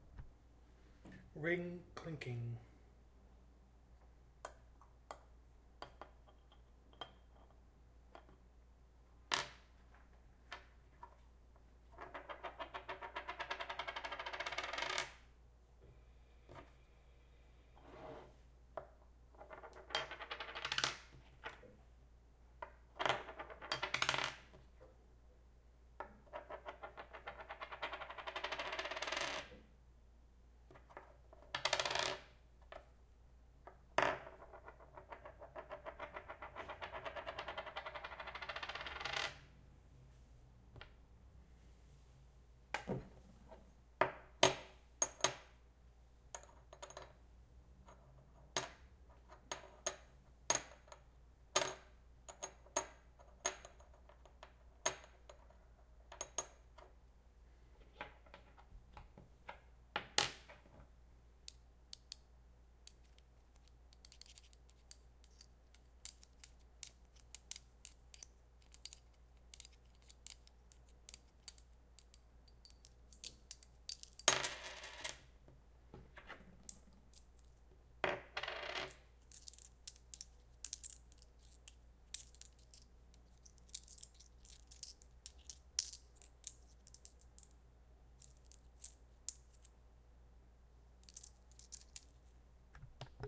FOLEY ring clinking
What It Is:
Spinning a small coin on a wooden table. Dropping small coins on one another. Hitting a small coin against a ring.
Two rings clinking against one another dangling from strings.